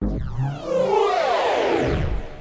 Pod Engine 3
A collection of Science Fiction sounds that reflect Alien spacecraft and strange engine noises. The majority of these noises have a rise and fall to them as if taking off and landing. I hope you like these as much as I enjoyed experimenting with them.
Alien, Electronic, Futuristic, Futuristic-Machines, Landing, Mechanical, Noise, Sci-fi, Space, Spacecraft, Take-off, UFO